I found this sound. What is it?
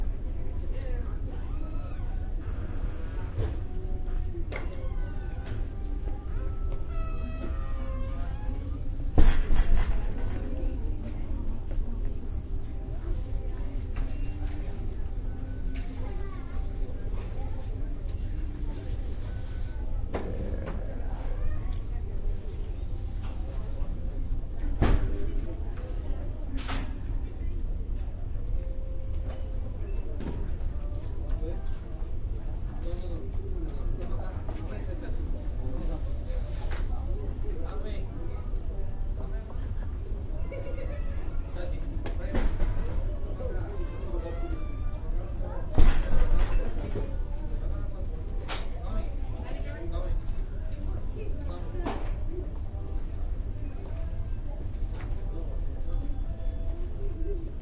Ambience medium store, loop
This here is a recording inside a medium sized store, from the front area. General ambiance can be heard, doors, beeps, music, etc. Recorded in Ft. Pierce, FL.